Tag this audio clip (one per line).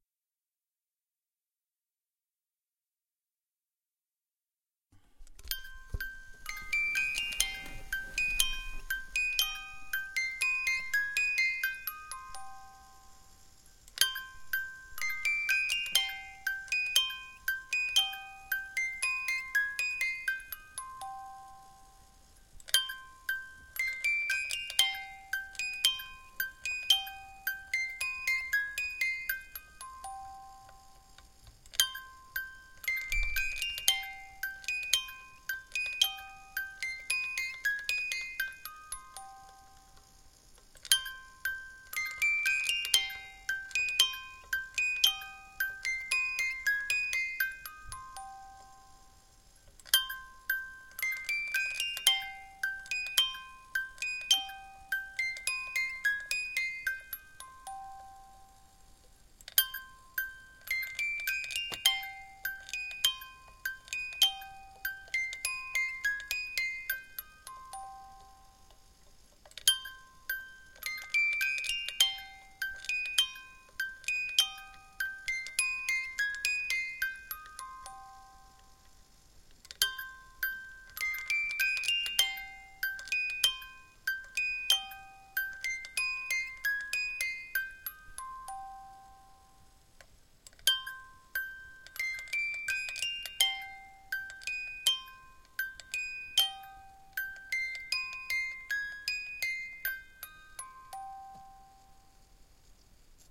zoom
music
black
ballet
swan
foley
h1
dancer
box